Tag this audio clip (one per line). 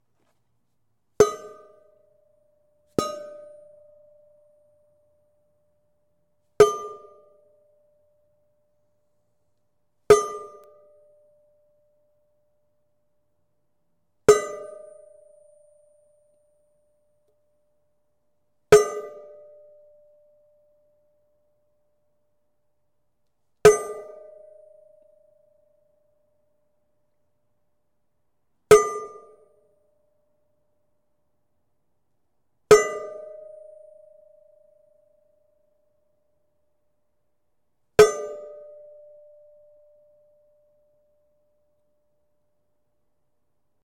aluminum
clang
clank
ding
hit
impact
industrial
metal
metal-clank
metal-plate
metallic
percussion
ping
plate
resonance
ringing
strike
sustained
ting